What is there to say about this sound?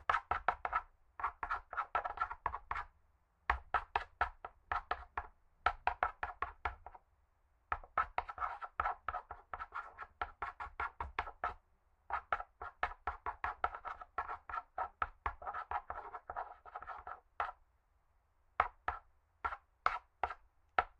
wood log against matte surface, chalk effect
chalk, writing